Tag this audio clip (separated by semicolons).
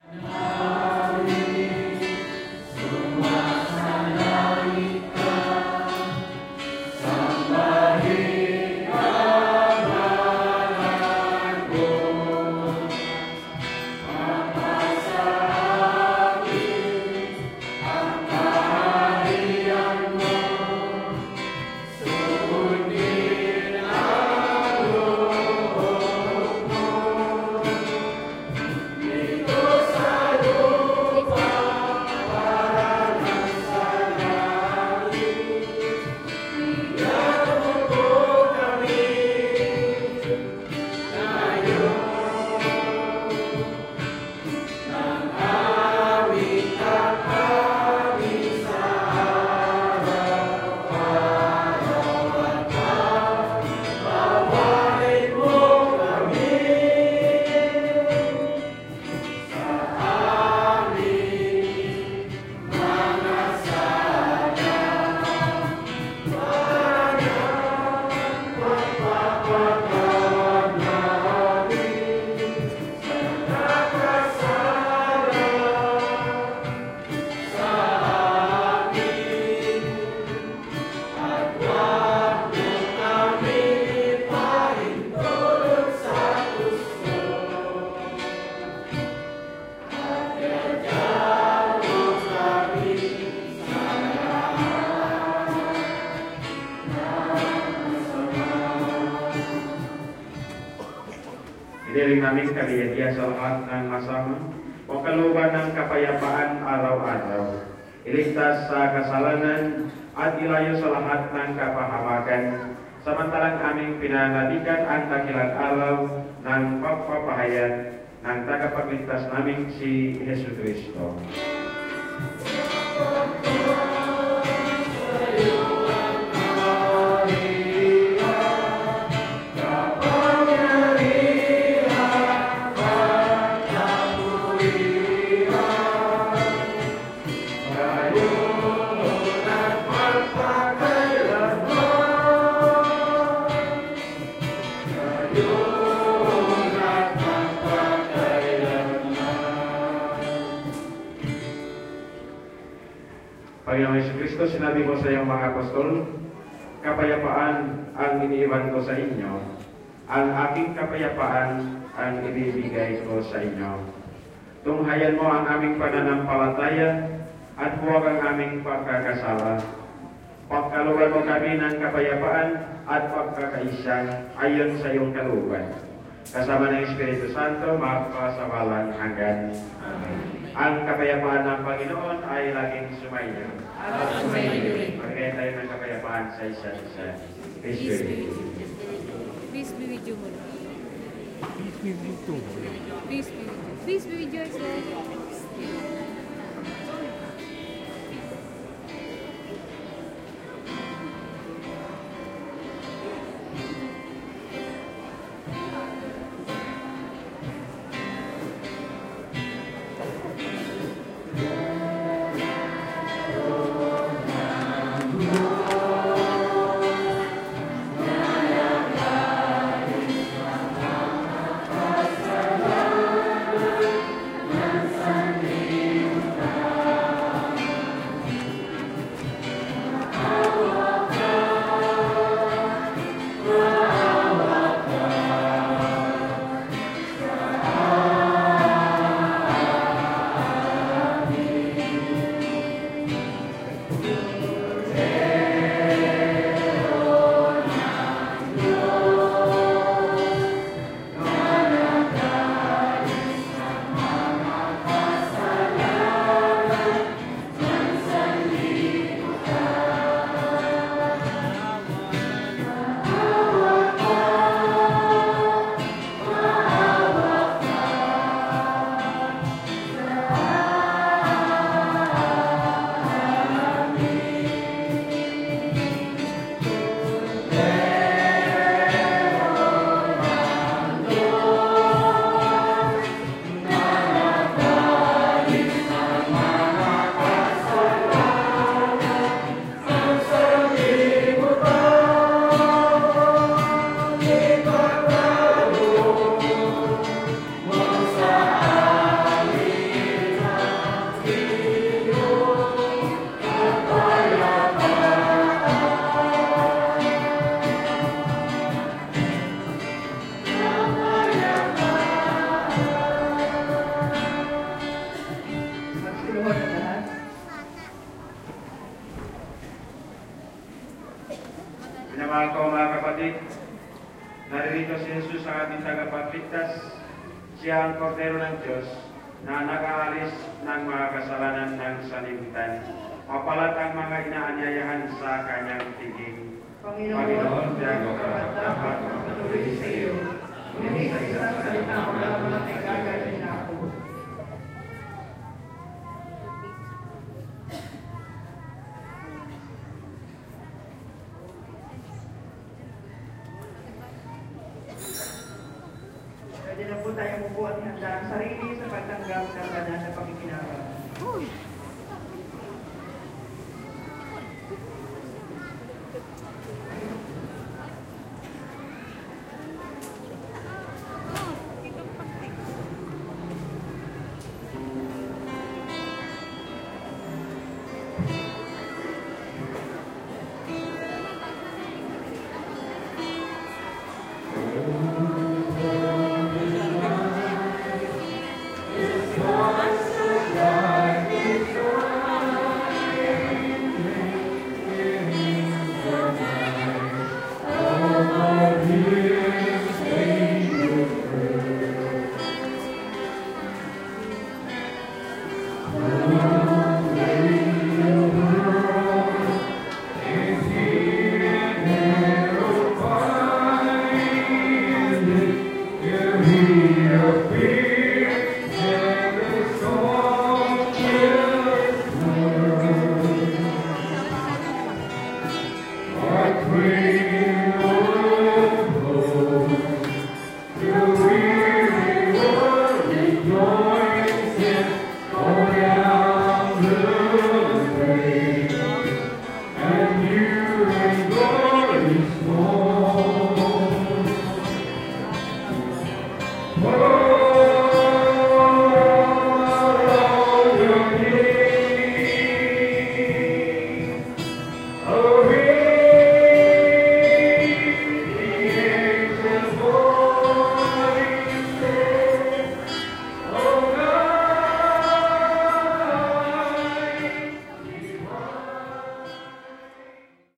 ambience; atmosphere; catholic; crowd; field-recording; indoor; mass; music; new-year; people; Philippines; priest; religion; religious; singing; song; soundscape; speaker; voices